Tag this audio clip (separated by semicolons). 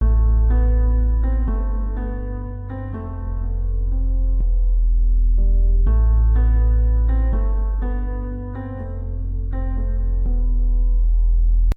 cinematic; piano; musical; music; looping; soundtrack; organ; loops; sad; melodic; melancholic; loop